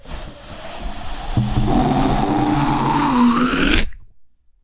Venting Balloon
Venting from a balloon held against mouth. Recorded with Rode NT5.
balloon, build-up, fx, mouth, rubber, stretch, unprocessed, venting, whoosh